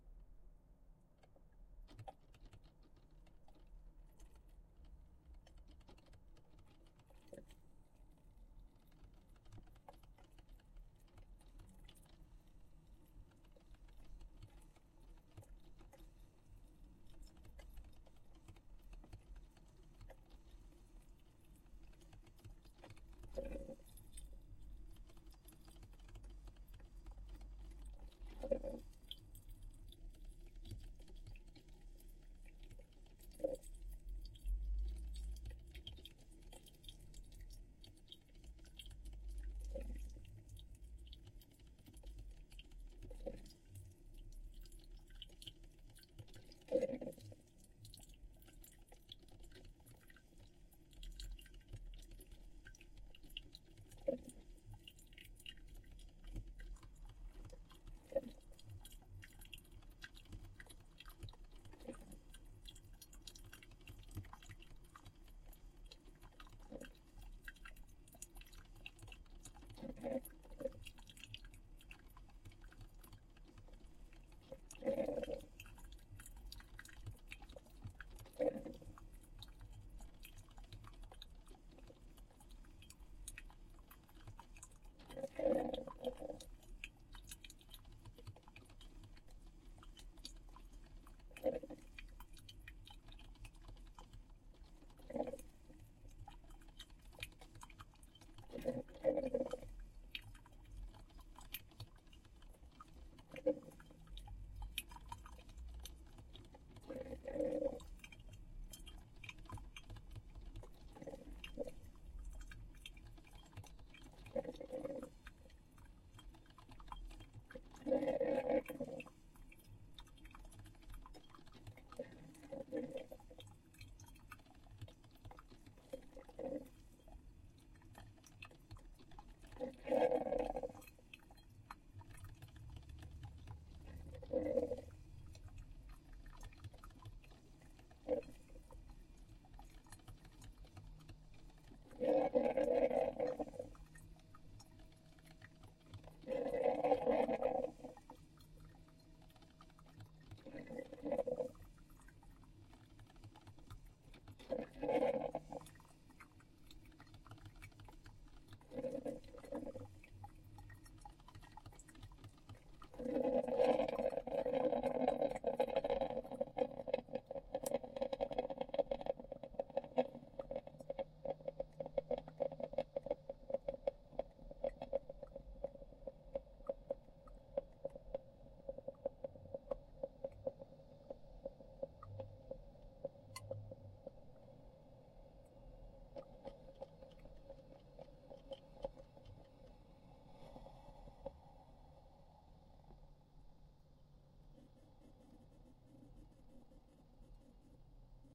coffee maker brewing full brew
brew, maker, coffee